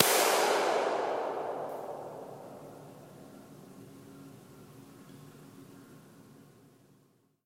relaxing in the steam room, stumbled across greatness. recorded on iphone.